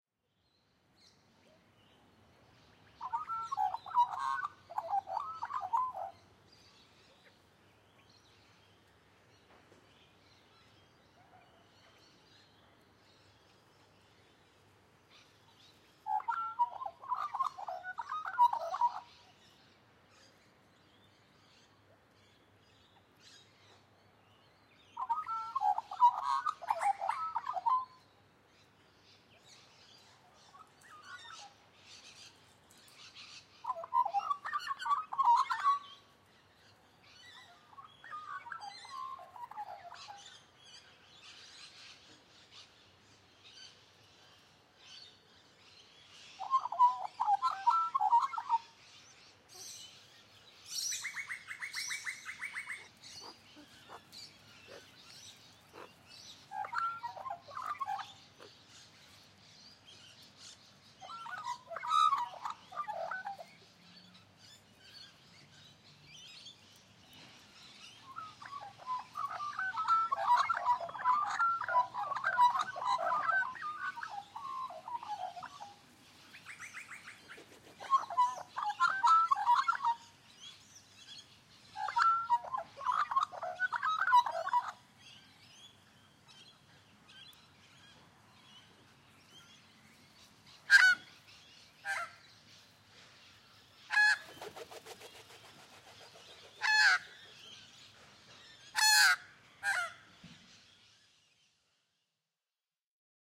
Magpies are intelligent birds, quickly training humans to feed them. Against a backdrop of parrots and noisy-miners (0:52 for a clear example), a couple of magpies on my front lawn are eating cheese scraps I threw out. One turns to face me (about 1 meter away) and starts carolling, later when the cheese runs out he gets a bit grumpy (1:30) and starts to squak. The light grunting you can hear is also a magpie sound (three magpies are eating most of the time), they are communicating amongst themselves. Toward the end (1:18 + 1:34 one magpie flys away then another).